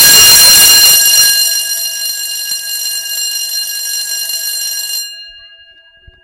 Schoolbell of the primary school "La Poterie", Rennes (France)